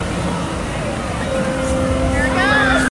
newjersey OC wondeready
Someone is ready at Wonderland Pier in Ocean City recorded with DS-40 and edited and Wavoaur.